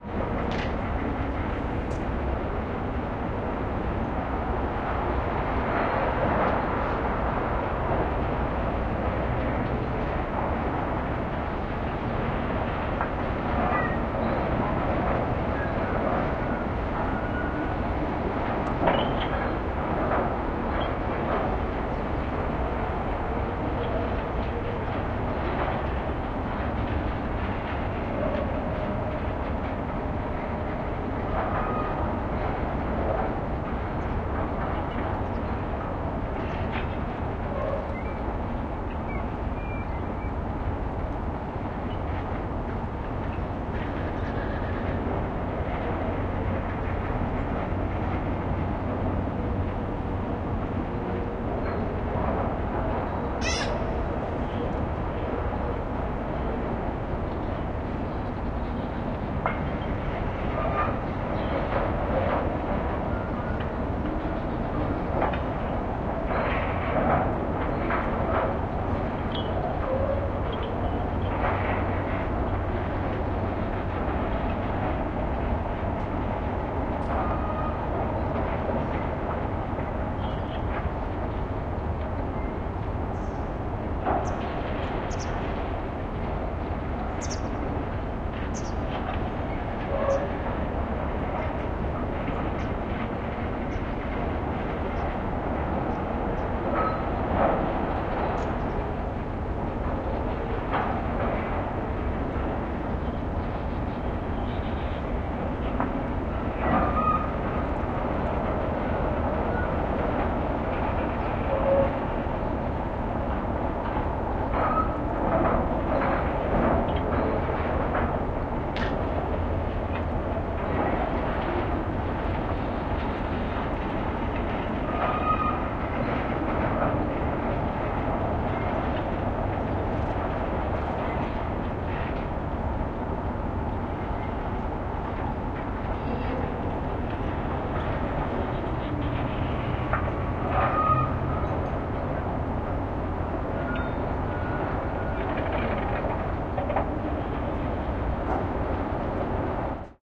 ambience - Moscow canal, cranes in a distant port
Moscow, Russia, ambience, field-recording